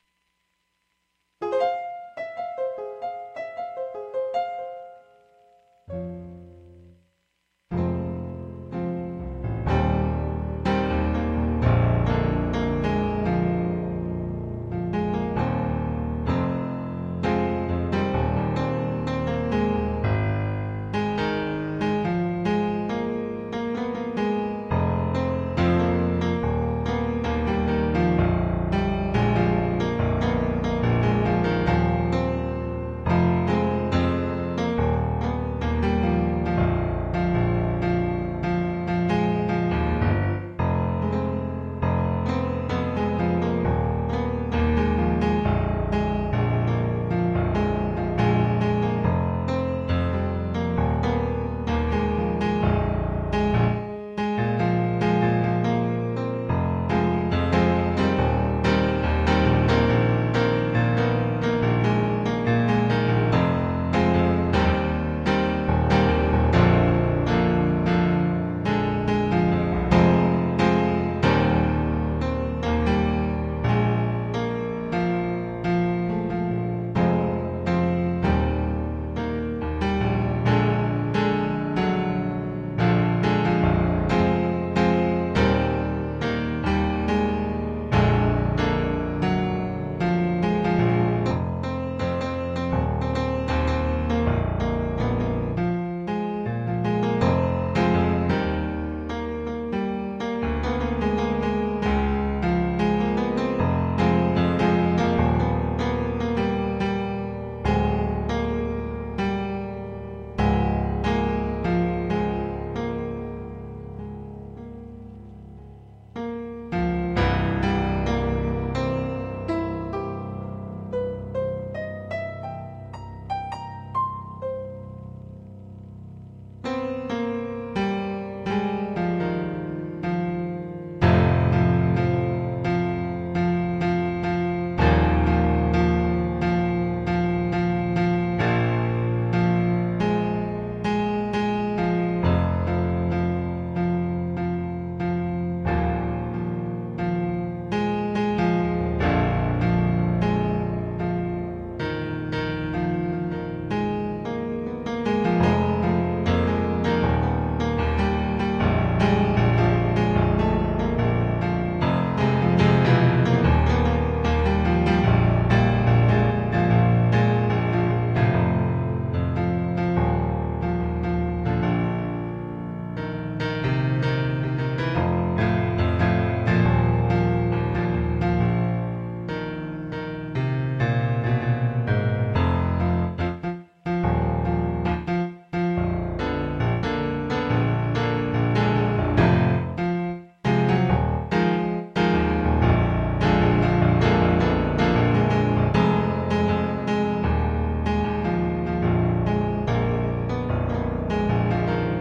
piano improvB 1 15 2011
Recorded using an Alesis QS8 keyboard using a direct signal. This sound file is unedited so you will most likely hear mistakes or musical nonsense. This sound file is not a performance but rather a practice session that have been recorded for later listening and reference. This soundfile attempts to pertain to one theme, as some of the older files can be very random. Thank you for listening.
unedited, theme, practice, piano, improv, rough, electric, improvisation